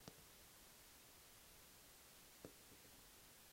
goma cae poom
poom, cae